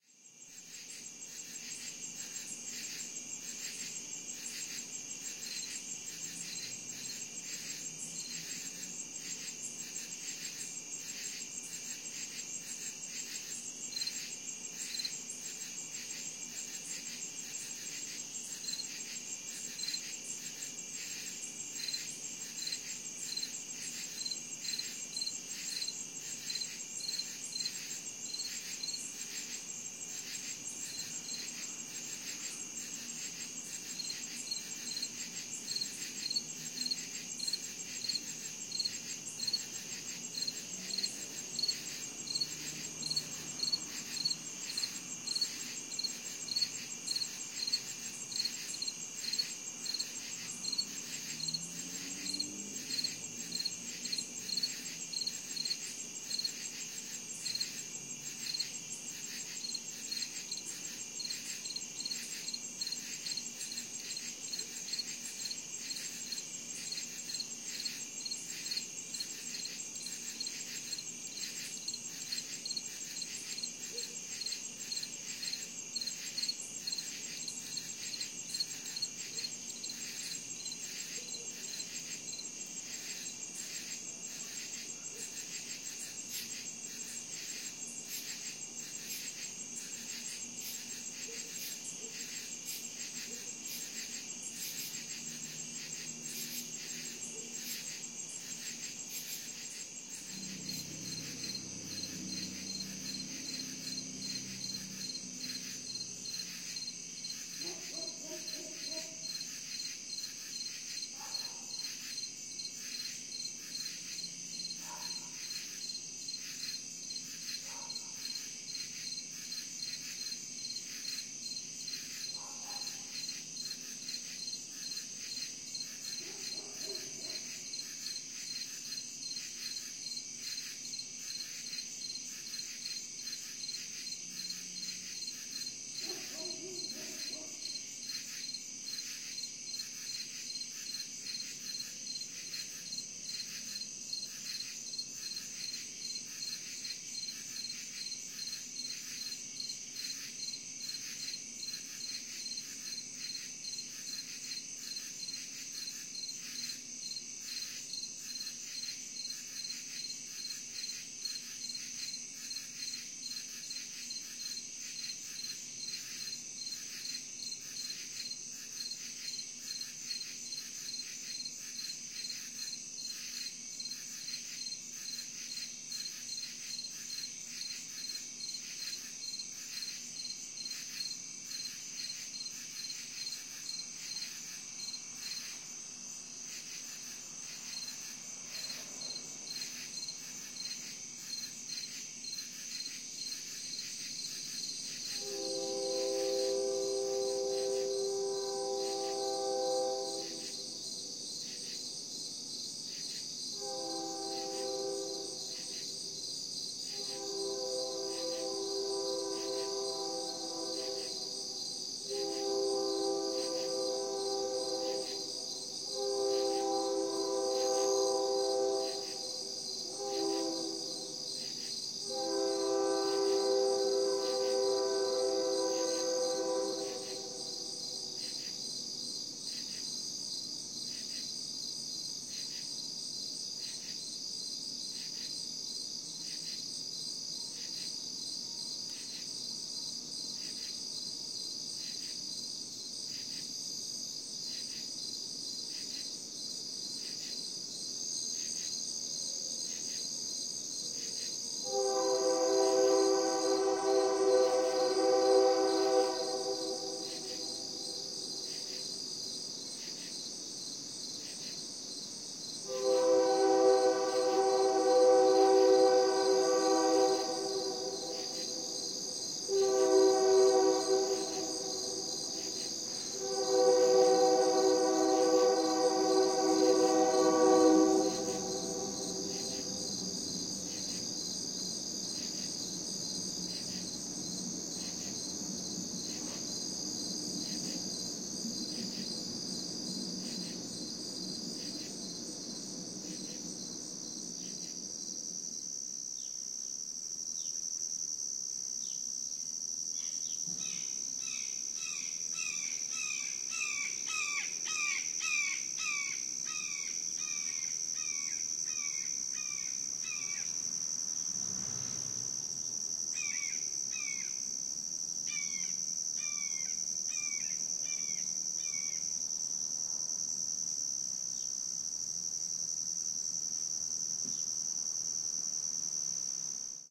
Overnight Early AM Midwest Town Plus Hawk Aug 22nd 2020
Recorded on August 22nd 2020 using my SONY PCM-10 recorder.
An overnight recording going into early morning. A beautiful soundscape of a insect-laden overnight in a small midwest town.
Fascinating to contemplate how, while us humans are sleeping soundly, preparing for our next day, nature, is performing this nightly chorus, under cover of darkness.
Gentle, drowsy insects gently rocking the world.
Washing over the world with the re-birth that night brings.